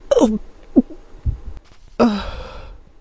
Gagging Male
Gagging and swallowing it back sound I made for one of my podfic! Enjoy!
gagging, male, retching, sick, swallowing